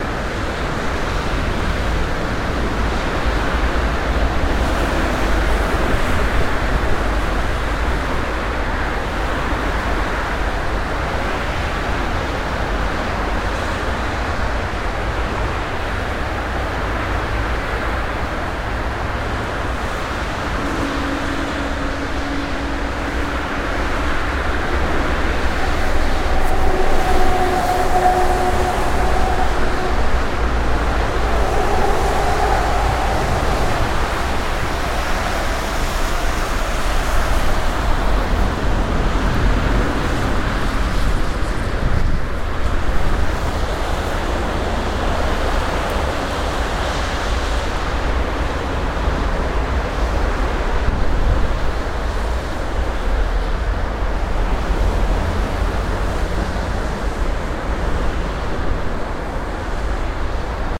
autobahn witzleben
recorded at the autobahn in berlin witzleben
the real noize